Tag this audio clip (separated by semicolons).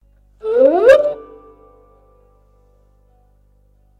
joy; cartoon; funny; gurdy; swoop-up; swoop